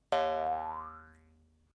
jaw harp3
Jaw harp sound
Recorded using an SM58, Tascam US-1641 and Logic Pro
boing, funny, silly, doing, harp